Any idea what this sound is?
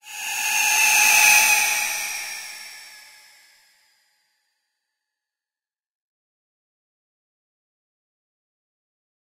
Flyby high tension
Granular sounds made with granular synth made in Reaktor and custom recorded samples from falling blocks, switches, motors etc.